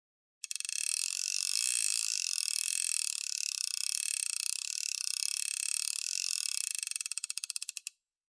Angel Fly Fish Reel Slow Pull 2
Hardy Angel Fly Fishing Reel pulling line slow speed
clicking, fishing, fly, pulling, reel, retrieve, turning, winding